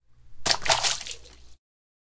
objects falling into the water, strong splashes
wet, water, drip, splash